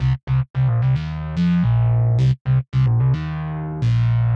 Grey Bas - c - 110 BPM-01
110bpm, bas, loop